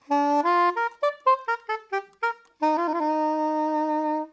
Non-sense sax played like a toy. Recorded mono with dynamic mic over the right hand.
loop, melody, saxophone, soprano, soprano-sax, soprano-saxophone